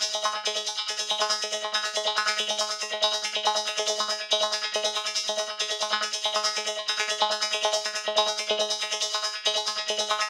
Banjo ish
a banjo like sound with some delay added